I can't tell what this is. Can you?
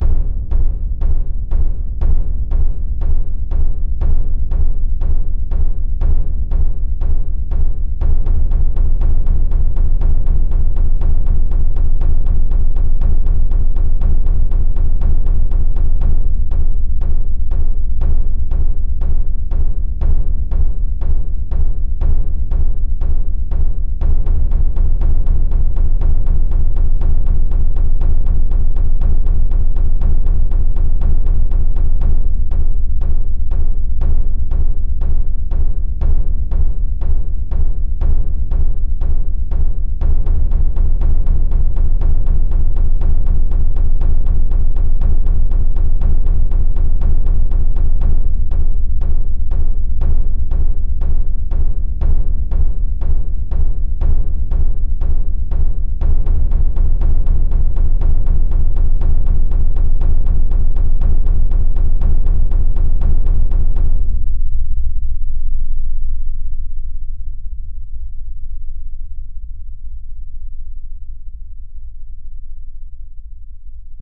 Drum Test
Because everyone needs suspense drums.
Easily loopable drum sound perfect for creating a feeling of tension.
Created using Musescore 2 (A music notation software), and Mixcraft 5.
Loop, Drums, suspense, Orchestral